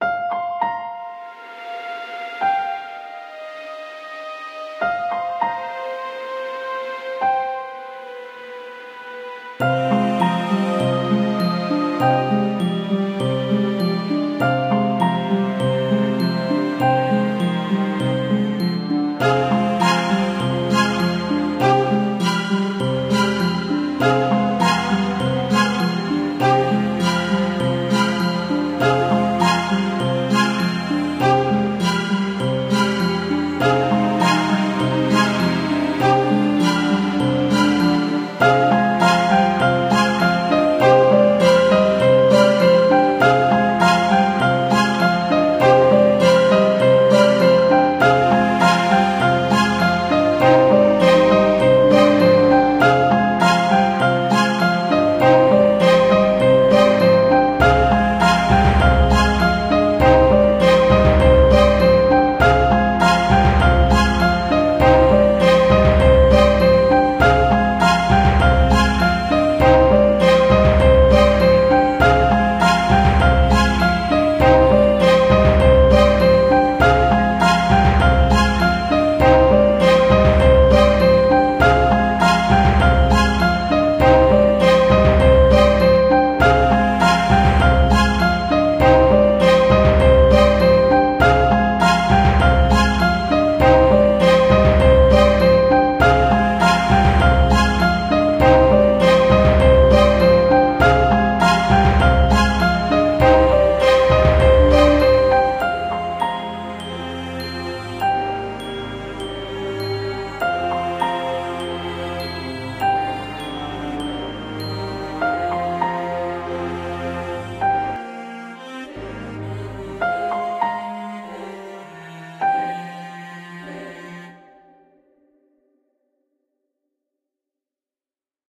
Hide My Time
A bit sad dramatic and suspense movie soundtrack for background
sad, threatening, subtle, orchestral, cinematic, piano, drama, epic, repetition, impending, ascending, 100bpm, suspense, appear, viola, plucked, increasing, sadly, movie, background, film, slow, strings, dramatic